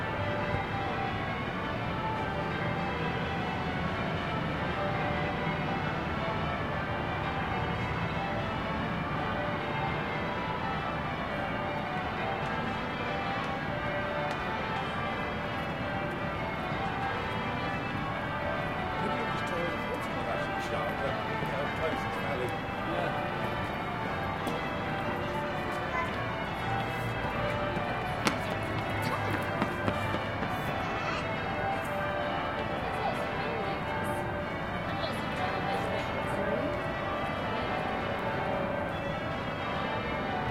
130216 - AMB EXT -Lower Thames St Chapel bells
Recording made on 16th feb 2013, with Zoom H4n X/y 120º integrated mics.
Hi-pass filtered @ 80Hz. No more processing
@ Backside of St Magnus the Marty's church of england. near river Thames.